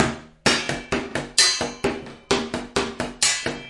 IMPROV PERCS 106 2 BARS 130 BPM
Sources were placed on the studio floor and played with two regular drumsticks. A central AKG C414 in omni config through NPNG preamp was the closest mic. Two Josephson C617s through Millennia Media preamps captured the room ambience. Sources included water bottles, large vacuum cleaner pipes, wood offcuts, food containers and various other objects which were never meant to be used like this. All sources were recorded into Pro Tools through Frontier Design Group converters and large amounts of Beat Detective were employed to make something decent out of our terrible playing. Final processing was carried out in Cool Edit Pro. Recorded by Brady Leduc and myself at Pulsworks Audio Arts.